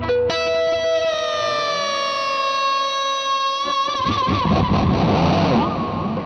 A short guitar sample with a whammy bar dive - plenty of effects -reverb delay etc.